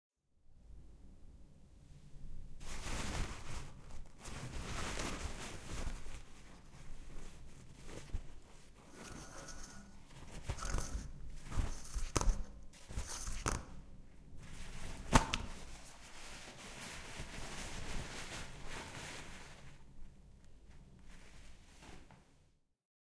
20190102 Ripping Out the Toilet Tissue Paper 1
tissue, toilet